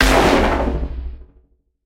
particle projectile cannon internal4
I made this sound by tapping a coca cola bottle with a crappy mono microphone and used various effects to make it sound cool. Probably good for all you game makers out there.
Game, Shoot, Laser, Fire, Gun, Projectile, Shot, Video-Game, Cannon